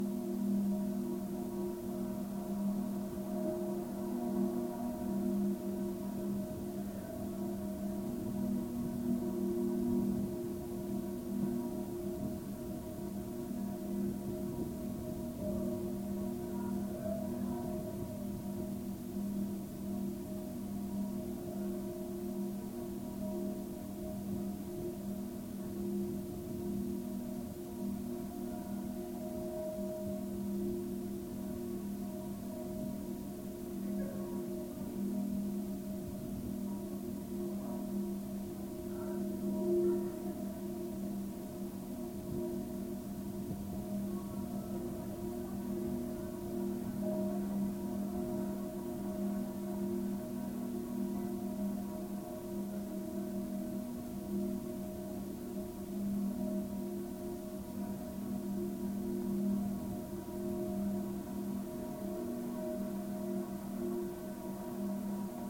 Jun Kaneko Dango 02 Schertler
Urban ambient captured as a contact recording of one of Jun Kaneko’s ceramic Dango sculptures (2 of 4) in San Jose, CA, USA . Sampled on February 12, 2011 using a Sony PCM-D50 and wired Schertler dynamic contact microphone, adhered with putty.